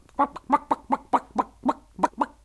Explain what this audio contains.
Chicken imitation
A man clucking like a chicken.